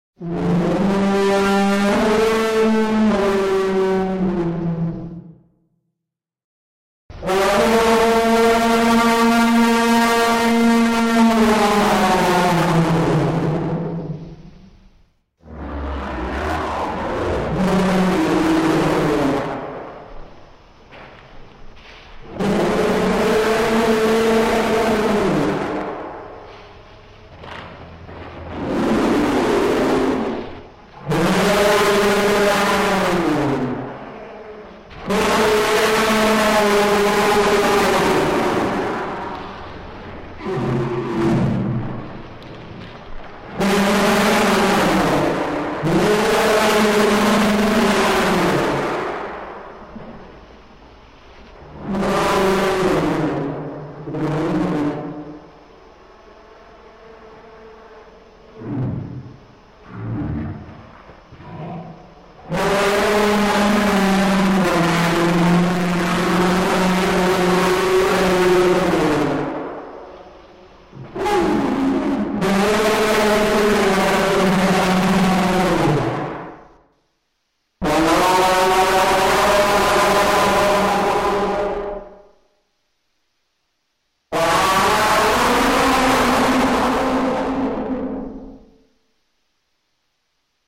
Mammoth Noising / Roaring / Yelling
Mammoth Noising / Roaring / Yelling